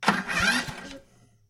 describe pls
Lawn Mower Electric False Start 02
The mower false[cold] starts (meaning it does not start).
cold, electric, engine, false, gasoline, industrial, landscaping, lawm, machine, mower, power, stall, start, tool